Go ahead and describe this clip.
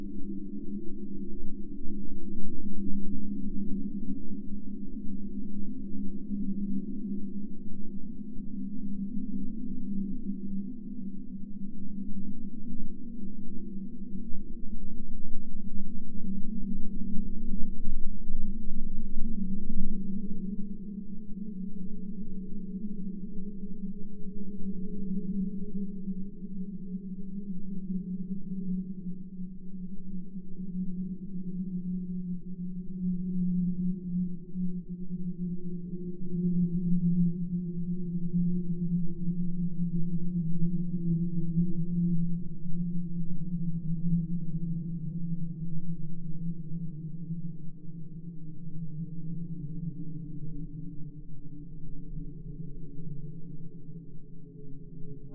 If I made a movie with open space shots, this would be my background. It is originally a rubbing sound, recorded on a Zoom H2, then filtered and slightly stretched. It's great as an ominous background sound.